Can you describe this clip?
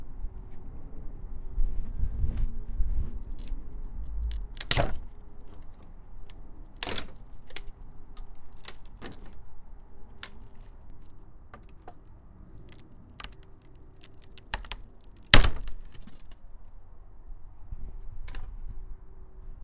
Tearing rotten wood 5
This is me in the garden tearing away rotten wood from my fence before fitting in new wood pieces.
Recorded with a Zoom H1.
Some nice stereo sounds on this one.
breaking, cracking, creaking, destroying, rotten, rotten-wood, snapping, squeaking, tearing, wood